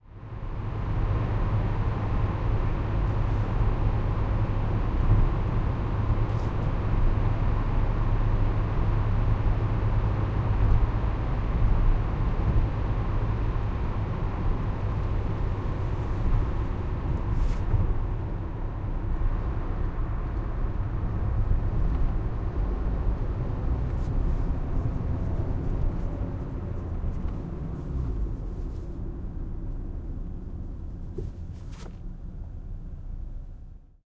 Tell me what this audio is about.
Recorded with a Sony PCM-D50 from the inside of a peugot 206 on a dry sunny day.
Driving medium speed then slowing down.